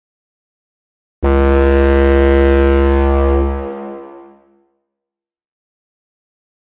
An epic horn, inspired by the Angry Boat. Created in Reaper using ReaSynth, ReaEQ, Sylenth1, and CLA Effects (Waves).